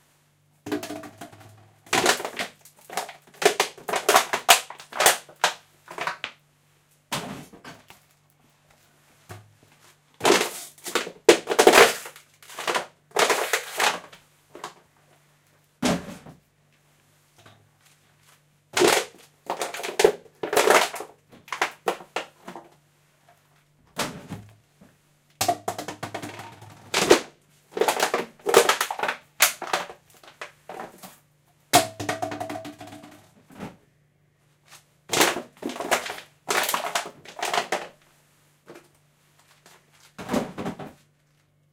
CRUSHING PLASTIC BOTTLES
Plastic bottles being crushed underfoot and placed in recyling bin
crackle, crunch, rustle